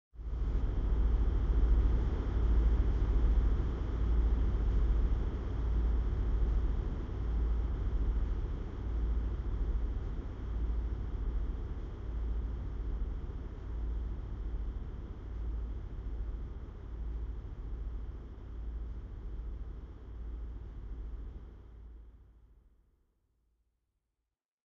One of a series I recorded for use in videao soundtacks.